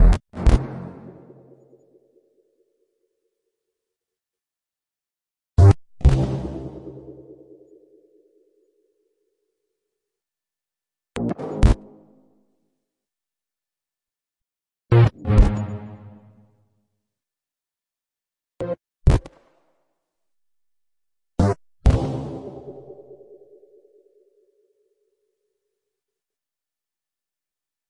dct-129bpm-g-Glitches1a
These are some glitch sounds from the audio buffer flushing to the audio driver. i guess it is a bug of my daw but i hope they will never fix it.
recordings of the ableton live glitch sounds is done with a motu audio interface and audacity wave editor.
abstract, digital, glitch, noise